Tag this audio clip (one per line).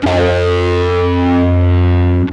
guitar
multisample
bass